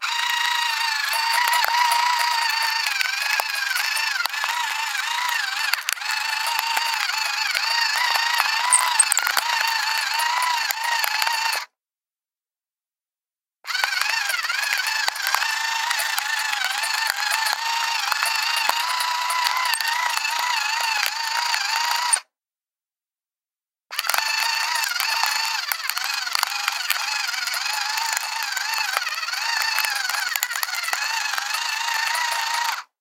Close-recording of an electric pepper mill.
electric, motorized, pepper-mill